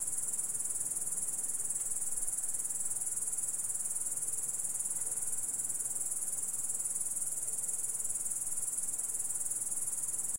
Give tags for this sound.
effect; grasshopper; nature

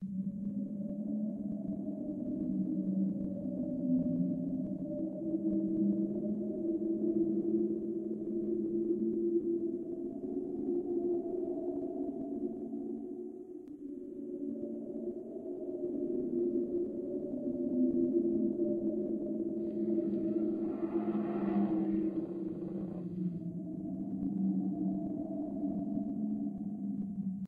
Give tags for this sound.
Halloween; wind

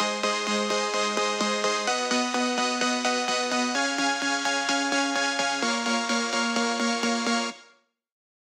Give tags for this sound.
Melody; G-Sharp-Major; EDM; Loop; Music; 128-BPM

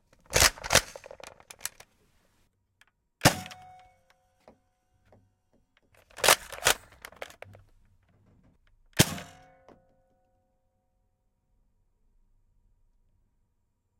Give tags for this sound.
Rifle; Plastic; Blaster; Foam; Ball; Nerf; Pistol; Rival; Shooting; Xshot; Chaos; Gun; Dart; X-Shot; Shot

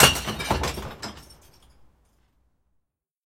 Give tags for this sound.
bin; bottle; break; crack; crunch; drop; glass; recycling; shards; shatter; smash

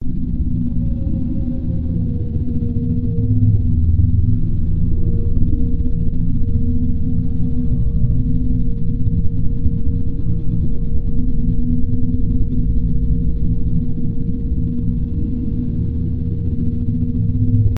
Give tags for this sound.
bass; float; engine; ambient; craft; hover; board; back-to-the-future; loop; fly

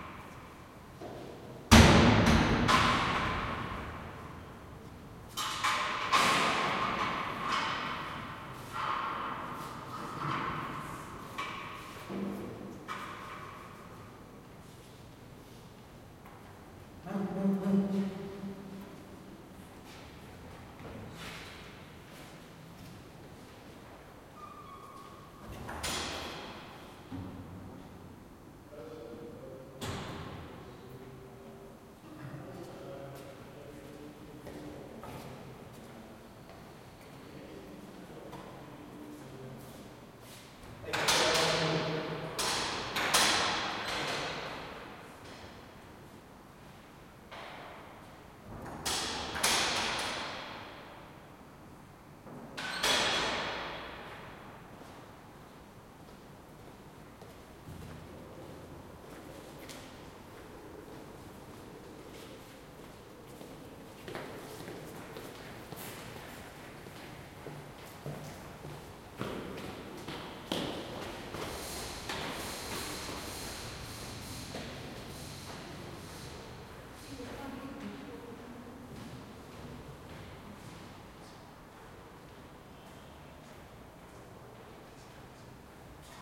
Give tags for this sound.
4CH; Atmos; Jail; Surround

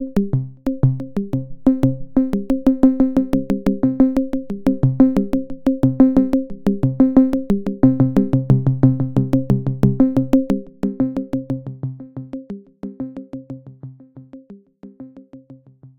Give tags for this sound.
melodyloop; melodic; loop; tom; electro; 90bpm; sine

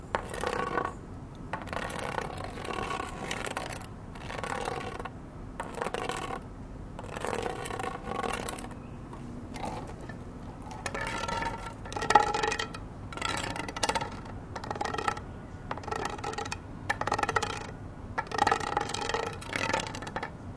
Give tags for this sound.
concrete; field-recording; plastic; scrape; wood

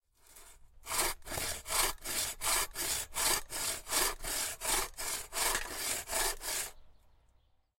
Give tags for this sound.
cutting; garden; saw; wood; panska; sawing

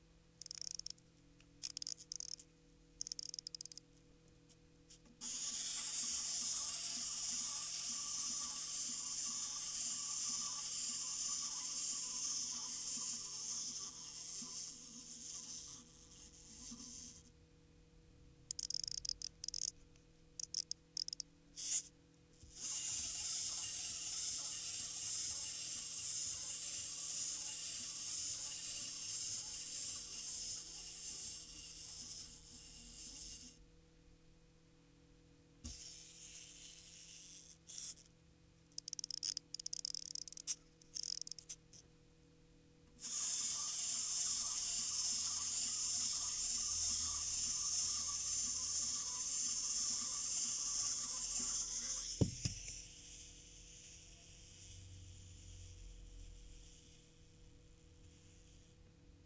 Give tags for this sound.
wind-up; toy

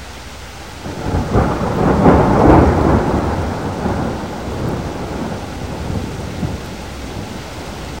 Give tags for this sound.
STORM; NATURE; THUNDER; RAIN; WIND

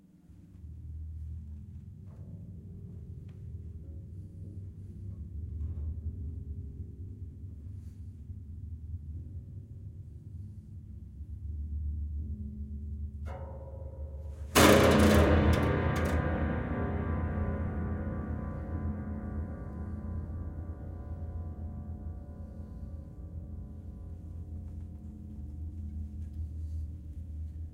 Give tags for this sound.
sound-effect; horror; percussion; piano; fx; effect; sound; acoustic; industrial; soundboard

